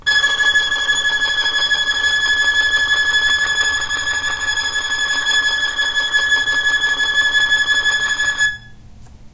violin tremolo G#5
tremolo, violin